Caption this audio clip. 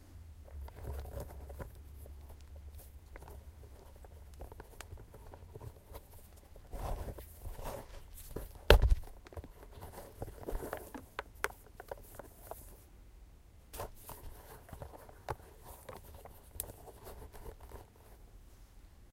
I wanted to record some sounds for Dare-12 but was travelling abroad. I did not have with me most of the items/objects I would normally touch on a daily basis.
This is the sound of me tying and untying my shoelaces. These are leather shoes and the shoelaces are actually thin strips of leather.
Recorded with a Zoom H1, built in mics. Recorder was placed on a carpeted the floor 10cm away from the shoe.